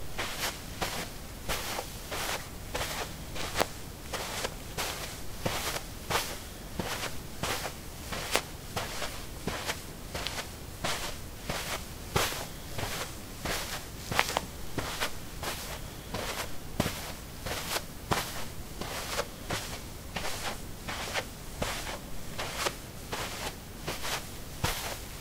carpet 11a sneakers walk
Walking on carpet: sneakers. Recorded with a ZOOM H2 in a basement of a house, normalized with Audacity.
footstep, footsteps, steps